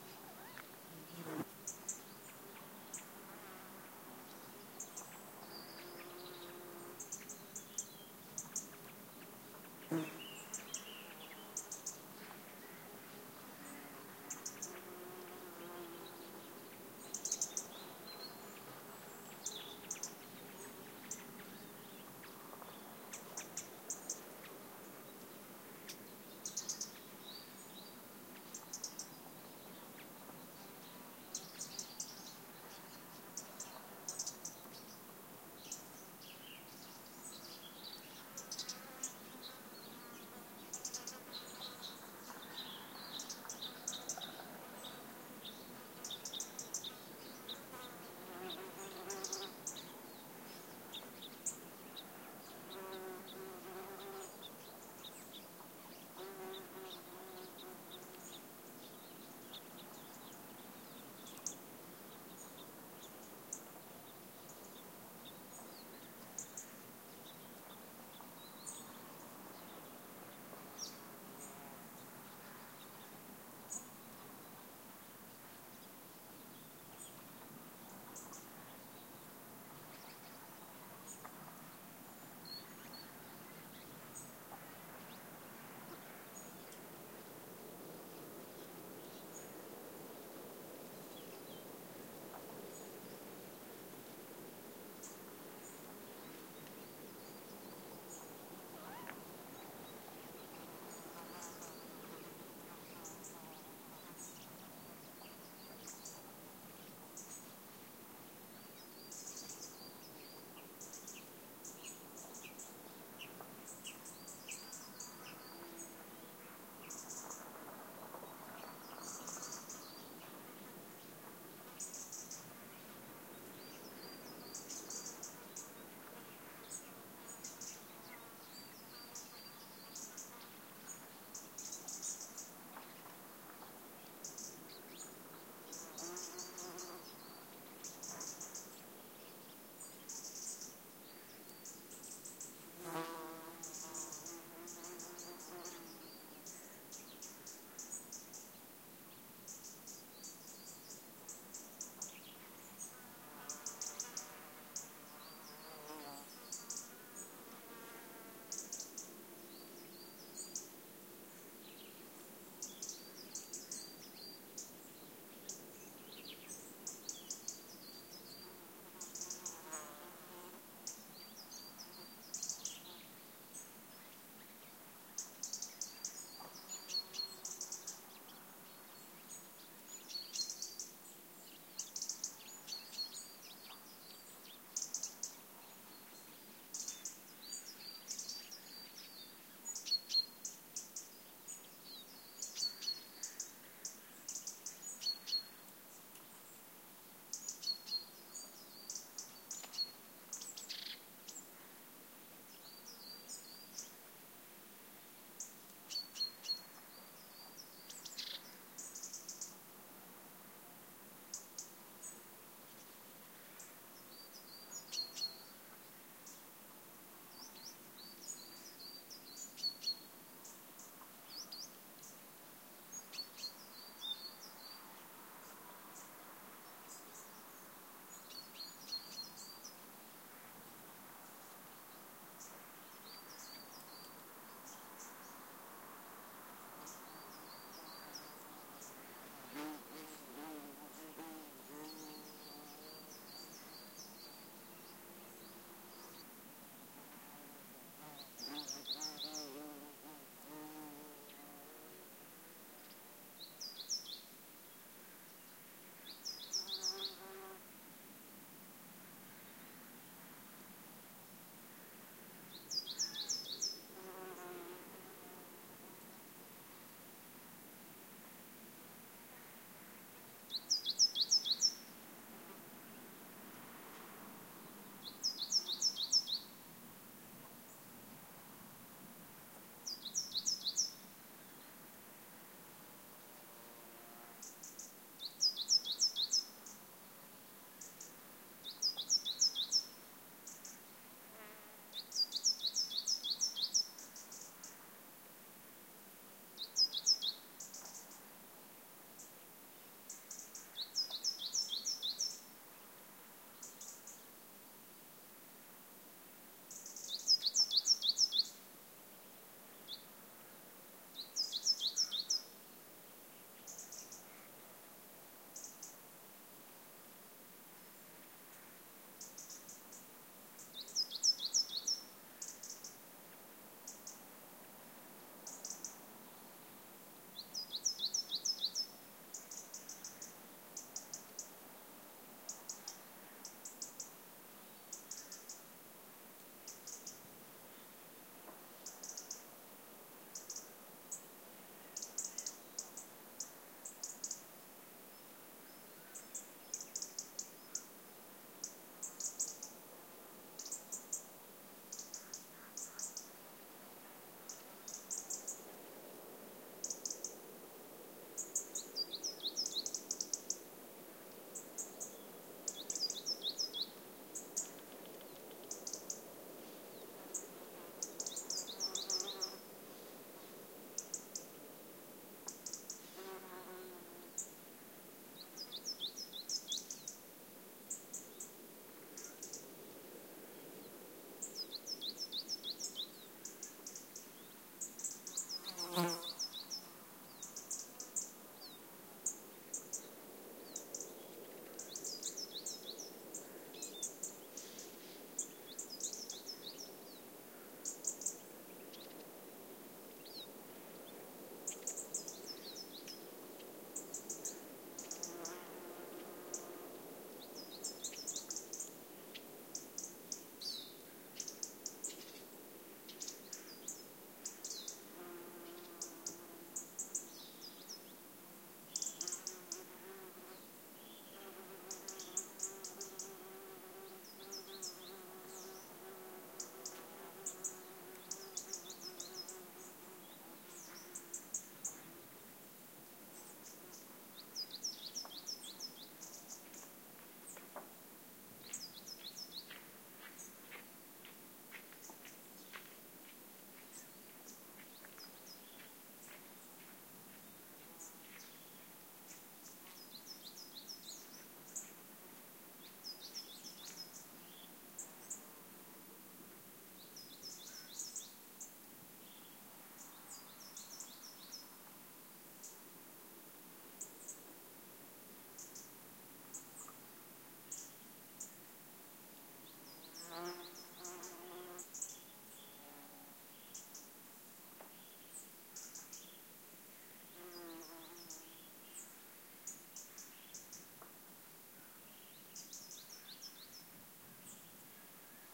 Natural ambiance with singing birds, buzzing insects. Recorded at 1000 m above the sea on Sierra de las Nieves Natural Park, near Ronda (S Spain) during an unusually warm autumn. Sennheiser MKH60 + MKH30 into Shure FP24 preamplifier, PCM M10 recorder. Decoded to Mid-side stereo with free Voxengo VST plugin
south-spain, autumn, forest, field-recording, ambiance, birds, mountains, nature, insects